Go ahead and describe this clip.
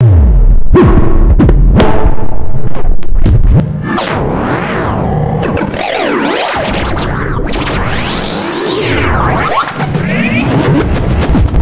12. As above plus reversed-echo bent rumble x bent rumble.
electronic, noise